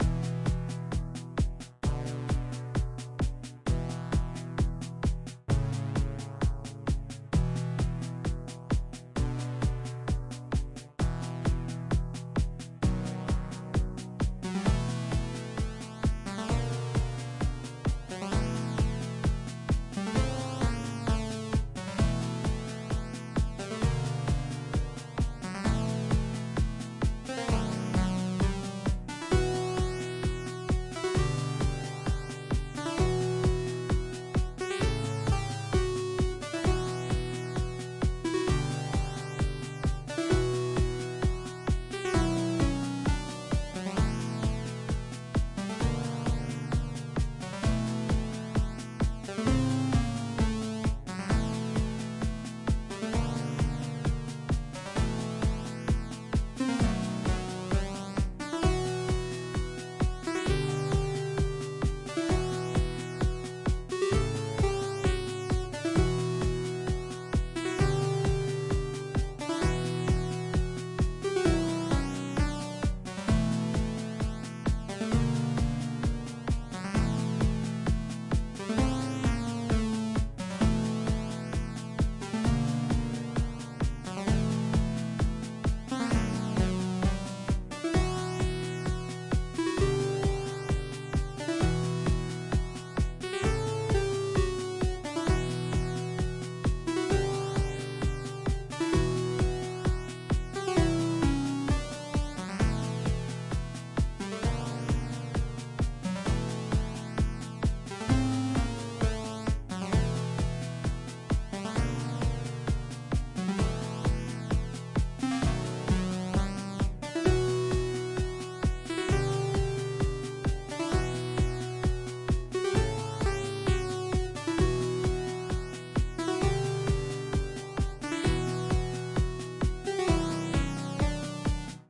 boss theme
8-bit, arcade, boss-music, fight-music, game, music